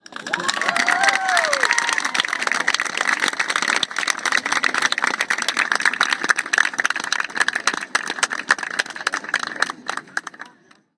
Large crowd applause sounds recorded with a 5th-gen iPod touch. Edited in Audacity.